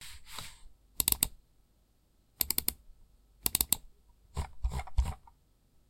clicking a mouse on a table